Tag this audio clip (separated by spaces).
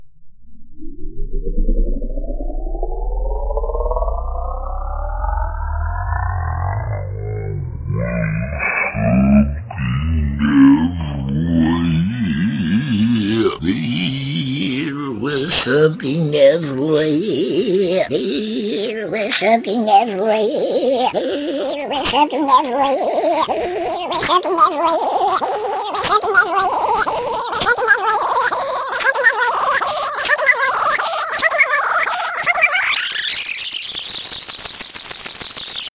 FX Glitch Malfunction Strange Tape